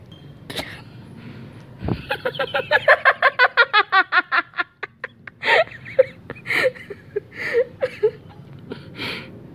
It's just me bursting out laughing during a recording session after I found the line I'm reading funny (I was literally reading off a line from a scripted blooper I made for my series).
female, funny, girl, joy, laughing, laughter, voice, woman